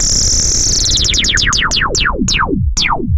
A slowing, retro sci-fi laser zap sound.